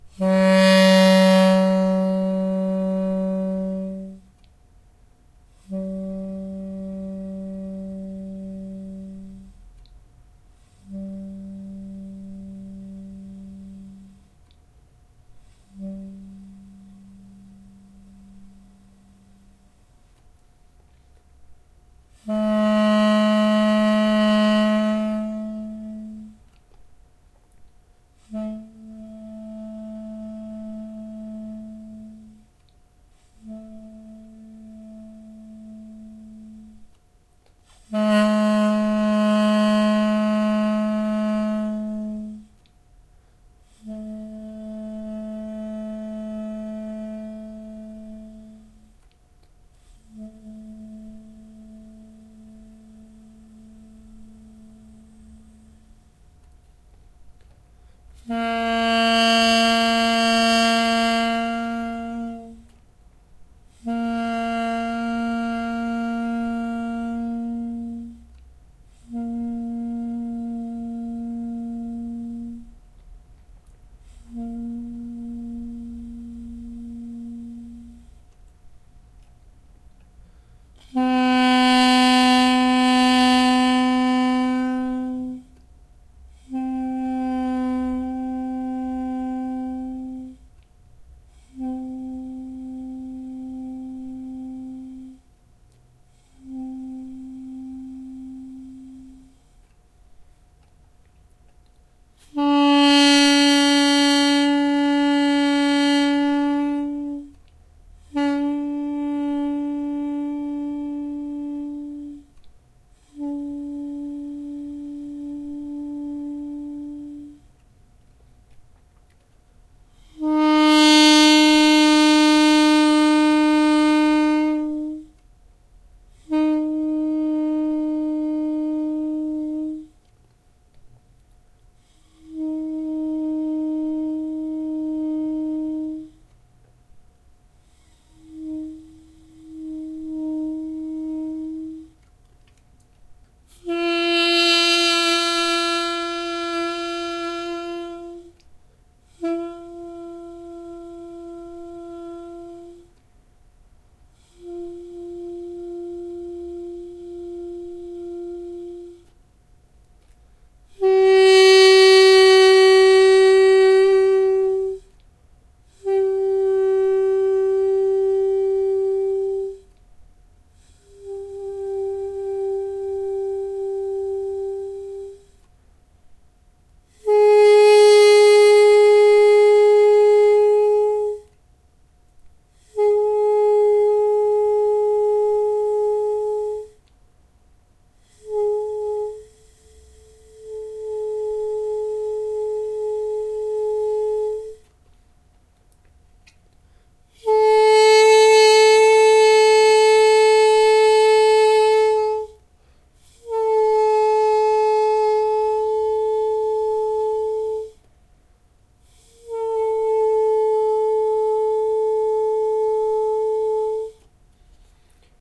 Scale by Duduk (dynamic variation) - Armenian double-reed wind instrument
Recorder: Zoom H4n Sp Digital Handy Recorder
Studio NICS - UNICAMP